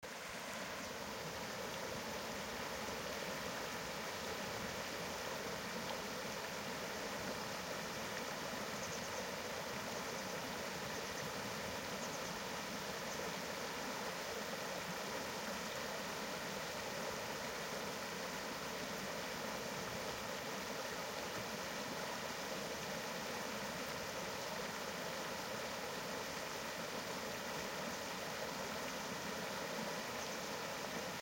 Mountain water flowing, stream, creek.
brook, mountain, stream, water, flow, creek, river